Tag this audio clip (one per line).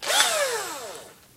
buzz
drill
electric
machine
mechanical
motor
screwdriver
tool
whir